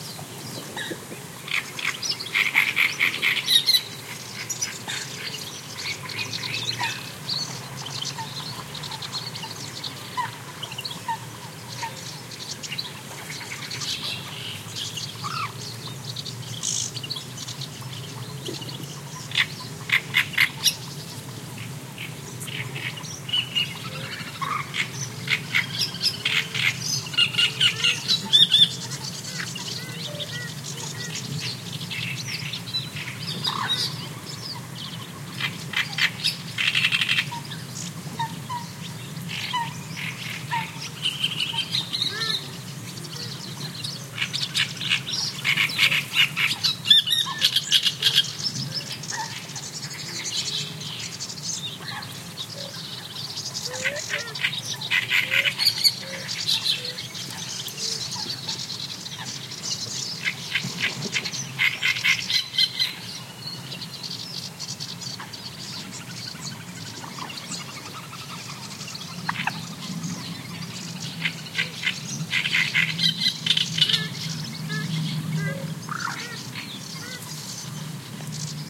20180401.marsh-016.warbler
Marsh ambiance, dominated by callings from Great Reed Warbler. Clippy XLR EM172 Matched Stereo Pair (FEL Communications Ltd) into Sound Devices Mixpre-3. Recorded near Centro de Visitantes Jose Antonio Valverde (Doñana National Park, Spain)
ambiance, birds, donana, field-recording, Great-Reed-Warbler, marshes, nature, south-spain, spring